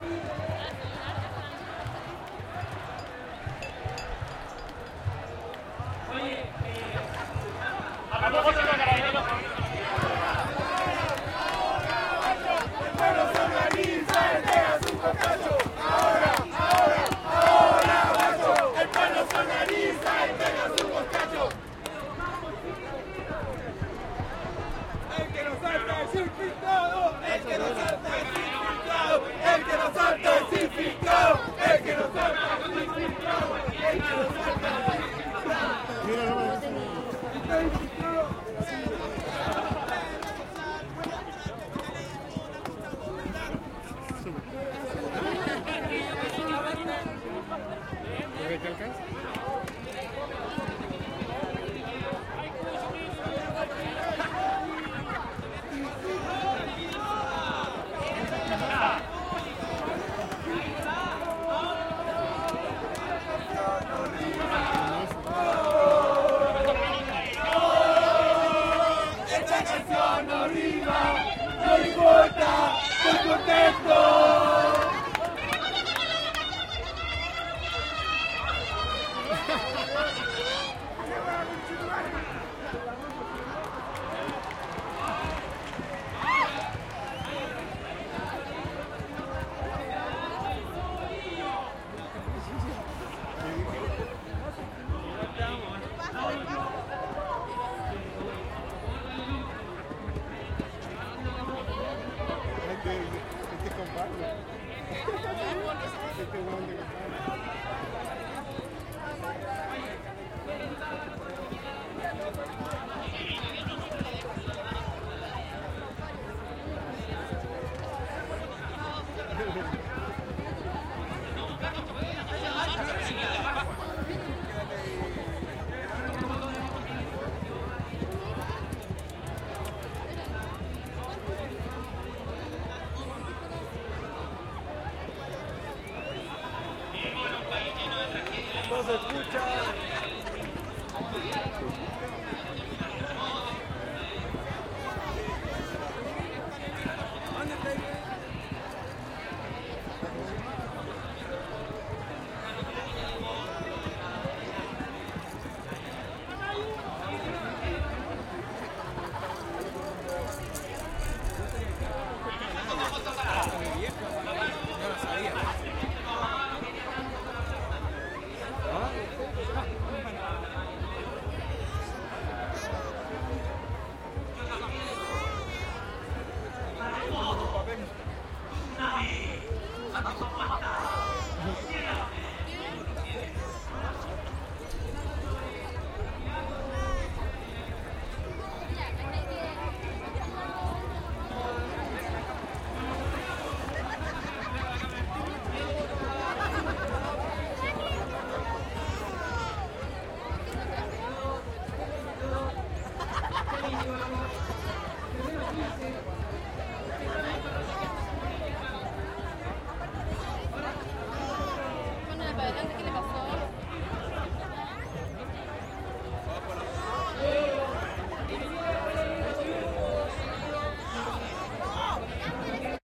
domingo familiar por la educacion 08 - canticos varios
ahora guacho el pueblo se organiza
el que no salta es infiltrado
esta cancion no rima, no importa, estoy contento
de fondo rosa espinoza
recital, crowd, park, santiago, chile, estudiantil, ohiggins, familiar, educacion, movimiento, publico, domingo, parque